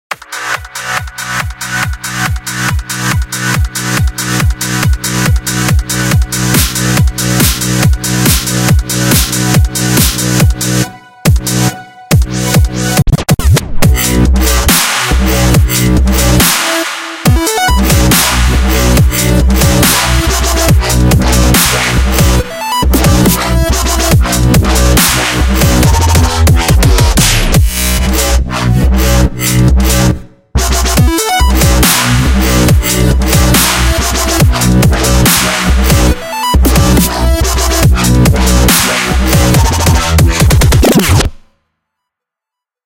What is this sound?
Dubstep Loop 6
bass-music, dubstep, fruity-loops, hats, bass